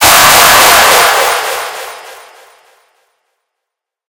Intense Jumpscare
A jumpscare sound.
Happy halloween!